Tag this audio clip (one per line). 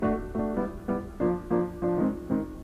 jazz
loop